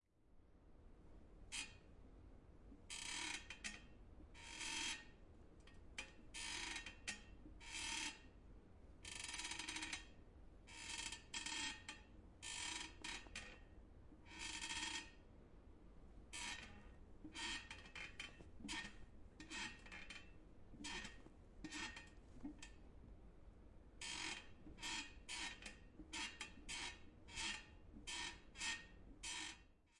Recorded with a zoom H6. Made with a squeaking chair.